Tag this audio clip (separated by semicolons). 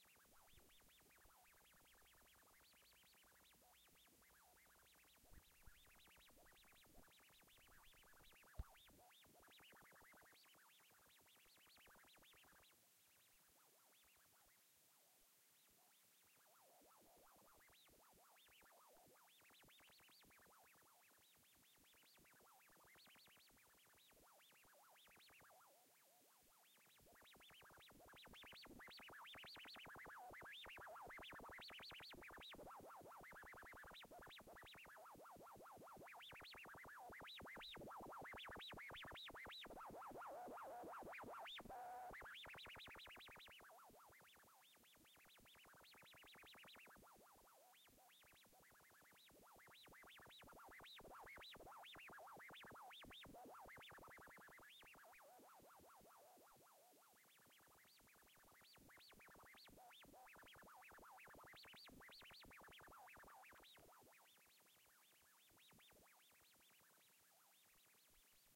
tone; scifi; interference; Wireless